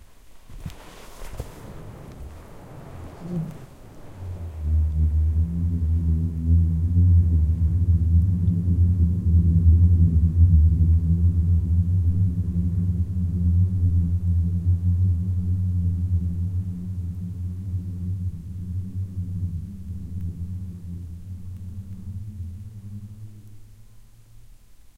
KELSOT27 long resonant slide binaural
Booming sound created via an avalanche on Kelso Dunes.
binaural, boom, california, droning, dunes, field-recording, kelso-dunes, mojave-desert, musical, sand, singing, usa